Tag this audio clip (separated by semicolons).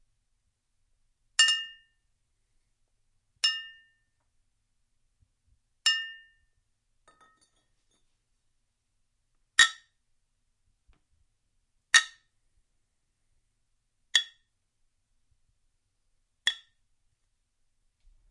beer; glass; bottle; toast; clink